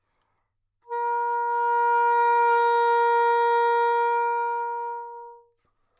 Part of the Good-sounds dataset of monophonic instrumental sounds.
instrument::sax_soprano
note::A#
octave::4
midi note::58
good-sounds-id::5619
Intentionally played as an example of bad-dynamics